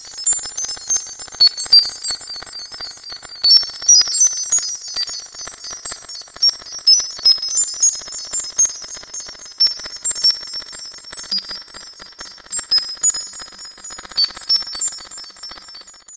I found in this VSTi, if you change the program while a note is still playing it triggers a lot of interesting glitches.